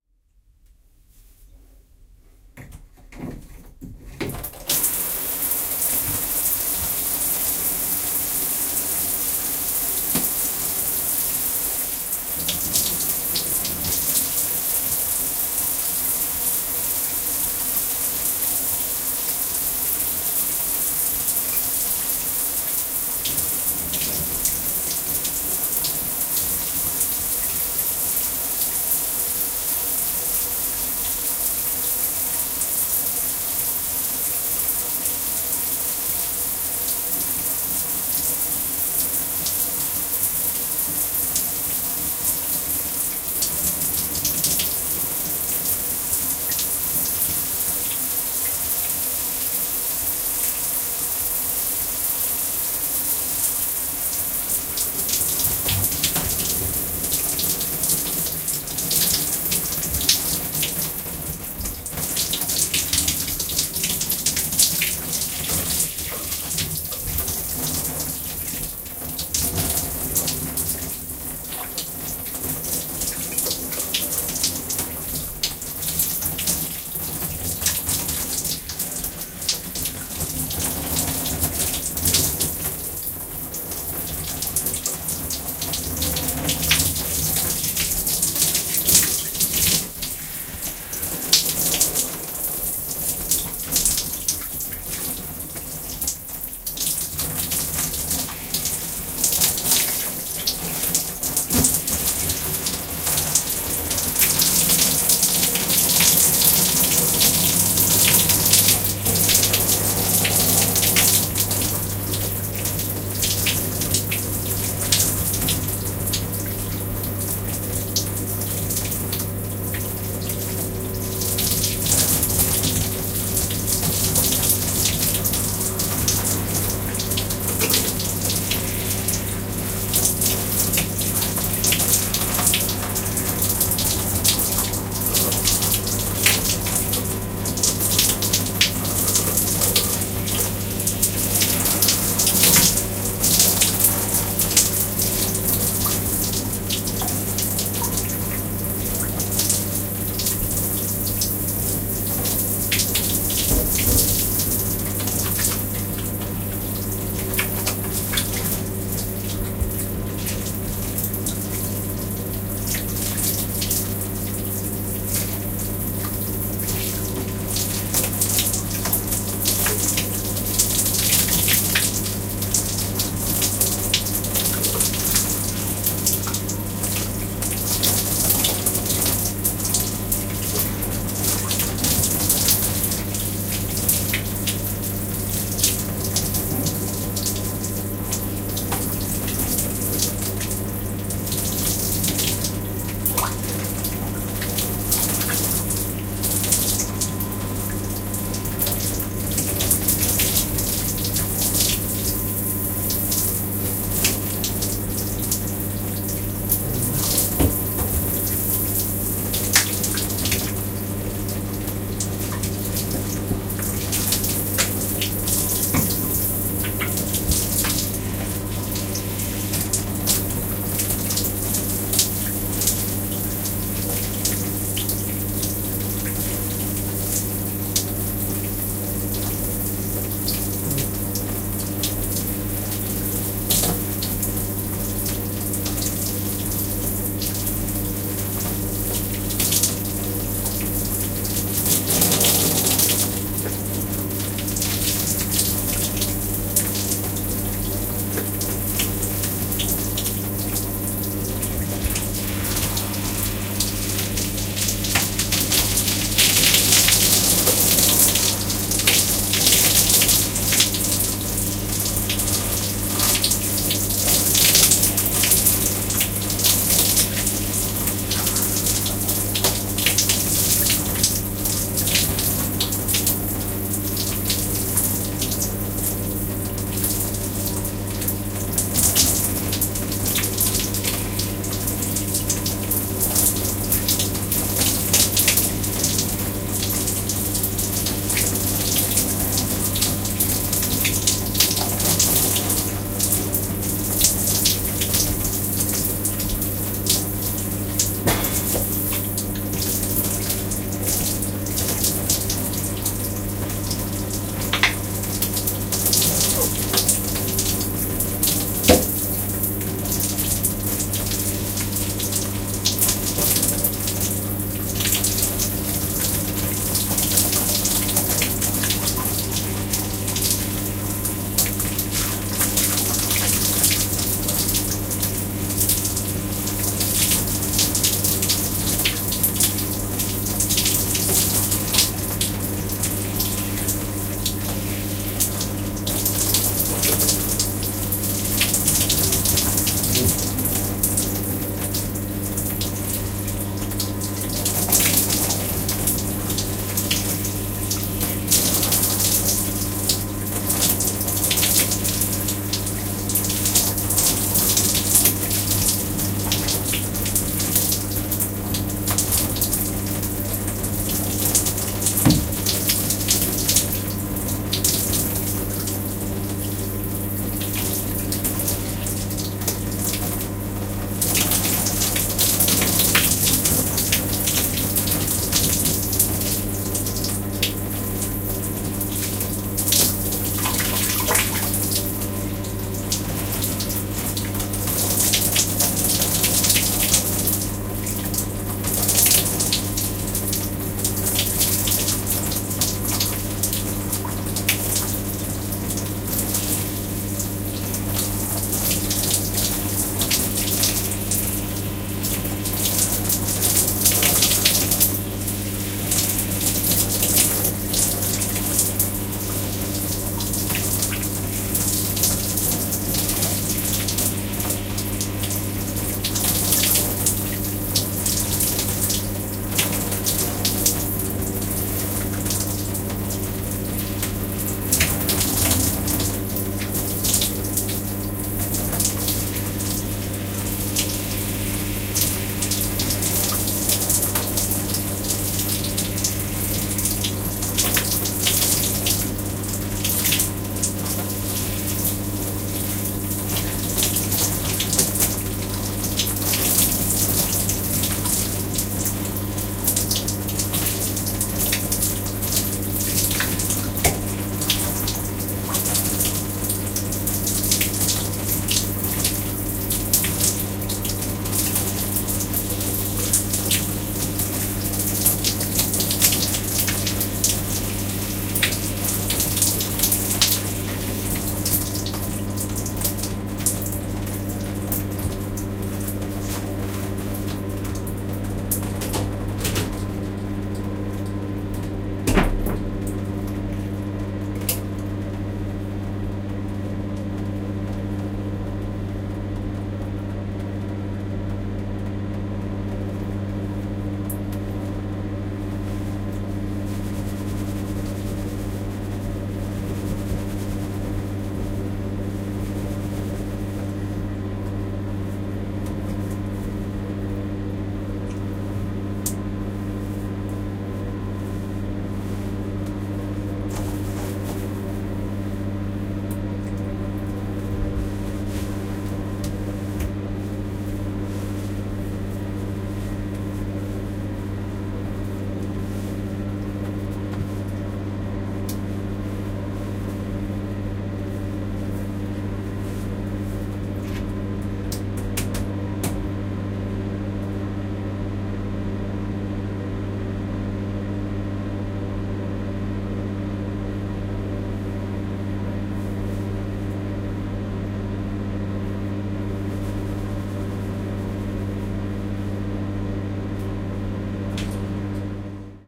Someone taking a shower!
Tascam DR-05
Running, Bathroom, Washroom, Bathing, Bath, Water, Shower, Showering, Toilet